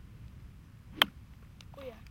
golf hitting ball
hard strike with golfclub on ball, sunny day on the green, at about 2 meter distance
ball, club, hitting, golf